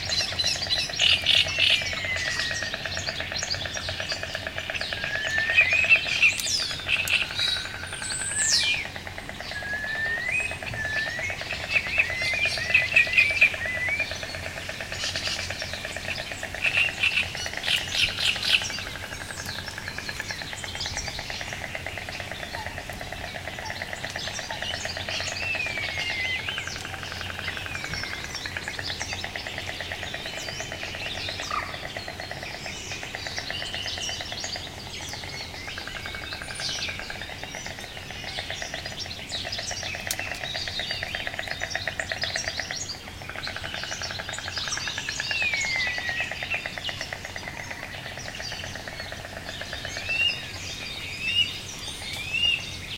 20060426.bolin.day.02
mixture of various bird species singing near a pond in Doñana National Park. Sennheiser ME62 into iRiver H120 / multiples cantos de pájaros cerca de una laguna
insects, nature, pond, spring, marshes, birds, field-recording, donana, white-stork